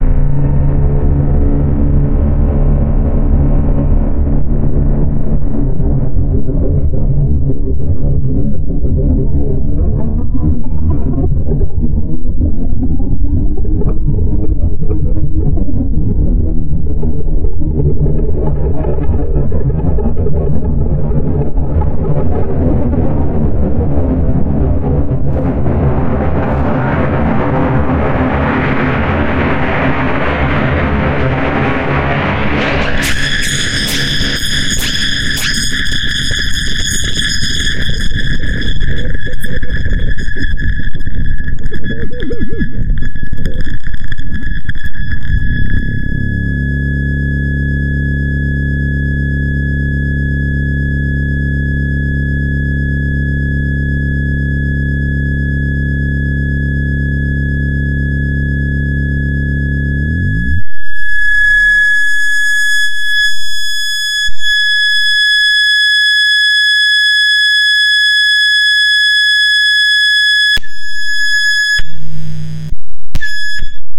Fx Glitch 1